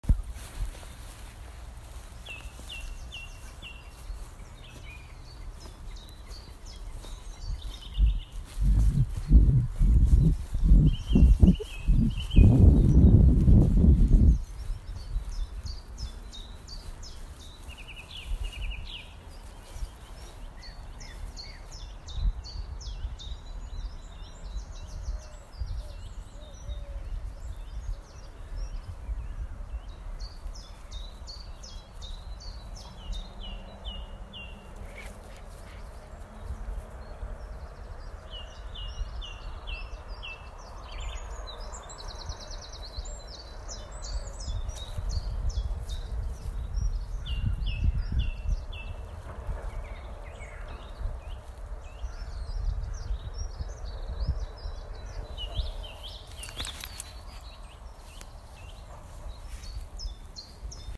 Lockdown Birdsong 27-03
A selection of nature sounds recorded when the traffic noise went away...lockdown 2020
Field-Recording, dog-walk, nature, birdsong